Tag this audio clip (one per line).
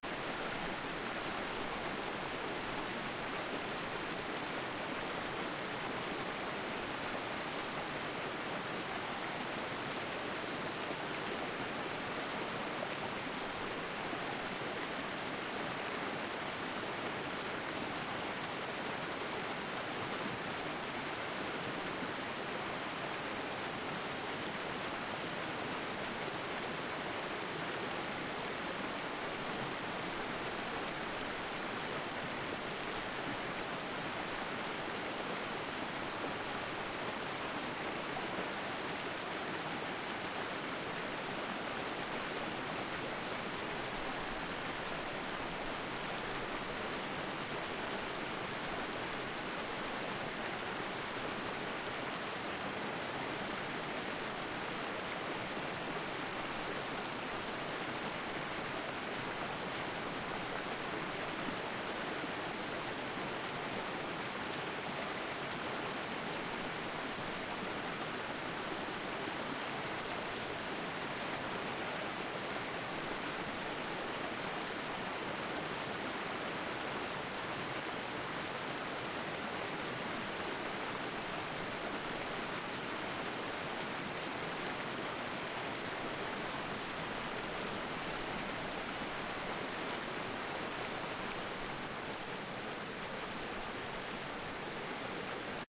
water
creek
trickle
stream
flow
river
relaxing
flowing